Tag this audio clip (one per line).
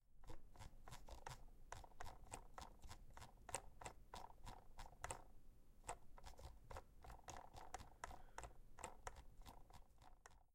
computer
mouse
scrolling